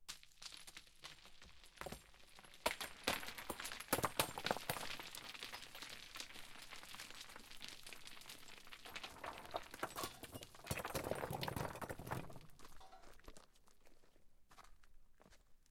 SFX Stone Calcit DeadSea Avalance foot #8-183
some small and large stones falling down a hill, very glassy sound
falling, rocks, stone